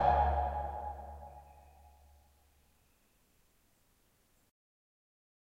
A couple of IR from a field recording at Carnarvon Gorge Amphitheatre, a very subtle sandstone acoustic, not a big reverb, but warms up a flute nicely....this place is an ancient aboriginal initiation site